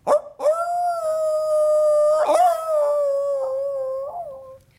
For "Young Frankenstein" I recorded three cast members howling. For play back, I'd vary speakers, delay, volume and echo effects to get a nice surround and spooky sound, that sounded a little different each time.
Spooky, Scary, Wolf, Howl, Halloween, Frankenstein, Dracula, Werewolf